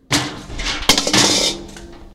Vandierdonck Joan 2015 2016 metallic object fall
////////Theme
Object falls
////////Description
For this sound, he was realized by means of a recorder. To improve this sound recording, I used a compressor to avoid the saturation, and of a reverberation to insist on the impression to be in a room.
//////// Typologie
C’est une impulsion complexe
/////// Morphologie
Masse : groupe nodal
Timbre harmonique : Eclatant
Grain : plutôt rugueux
Allure : stable, pas de vibrato
Dynamique : attaque plutôt violente